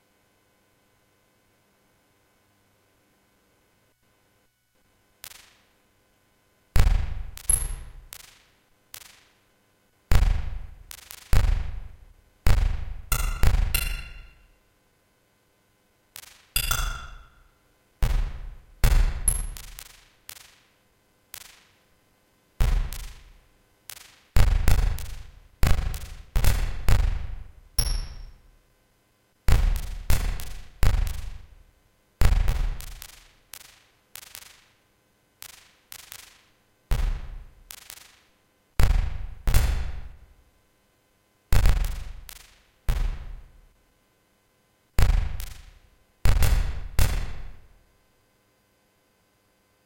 Photon guns 3
This time you are in the enemy spacecraft. You can hear how the photon shots hit the metal body, sound variation depending how far from the hit you are.
aliens, photons, Space, spacecrafts, spacewar, weaons